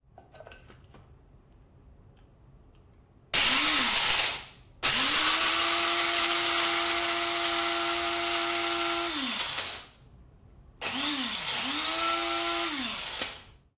Coffee Grinder Several-grinding-durations
Coffee grinder grinding beans
grinder, grinding, coffee